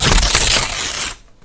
ripping a paper bag